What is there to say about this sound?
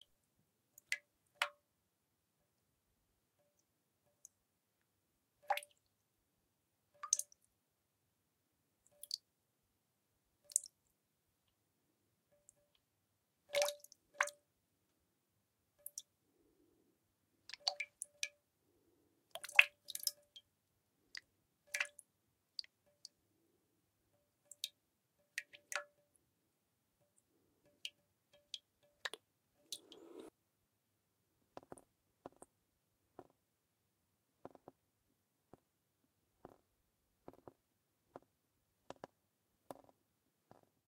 Set of water sounds we made for our sound library in our studio in Chiang Mai, North Thailand. We are called Digital Mixes! Hope these are useful. If you want a quality 5.1 or 2.1 professional mix for your film get in contact! Save some money, come to Thailand!